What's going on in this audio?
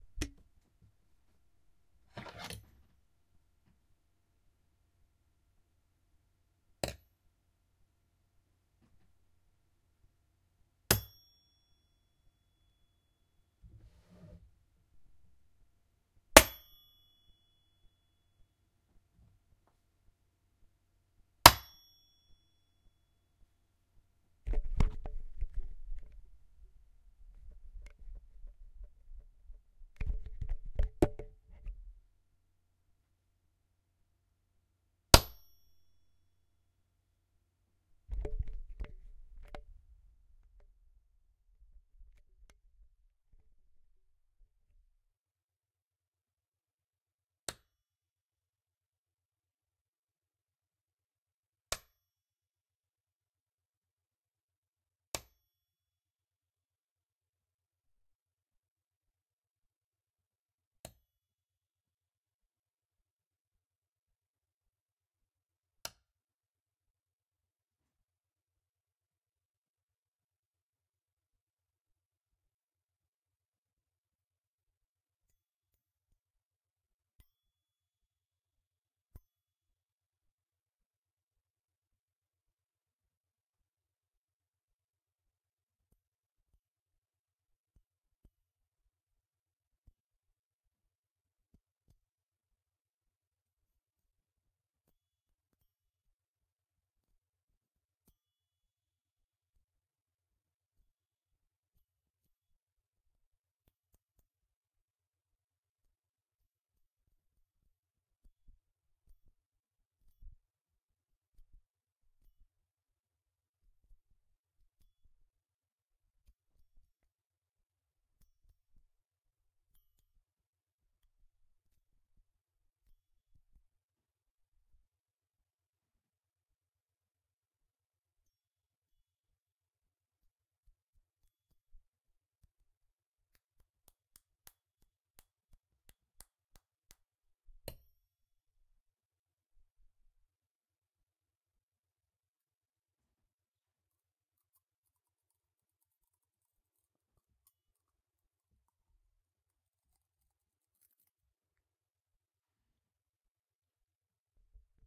hitting a dinner fork on a counter
clank, dinner, eating, fork, knife, metal, resonant, tuning, utensil